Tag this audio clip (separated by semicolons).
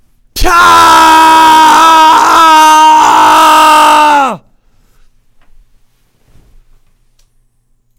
weep; grief; scream; pain; shout; yell; man